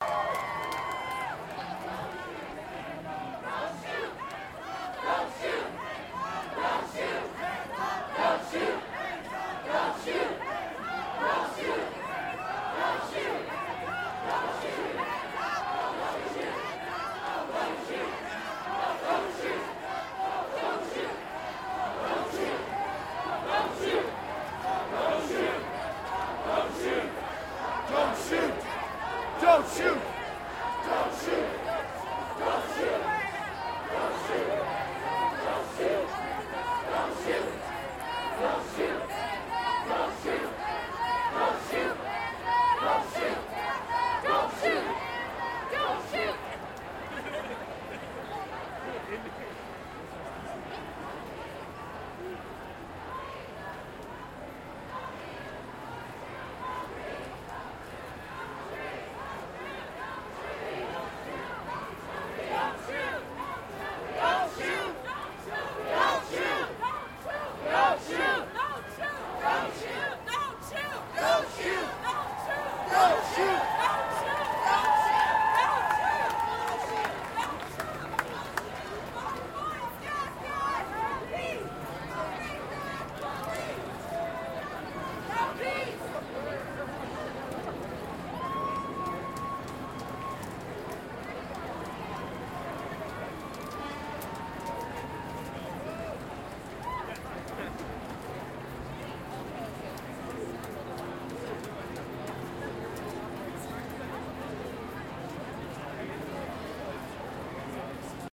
Crowd Protest March passing for Black Lives Matter 2020 in Toronto
contact for raw audio
2020, black-lives-matter, crowds, field-recording, hands-up-dont-shoot, march, no-justice-no-peace, protest, toronto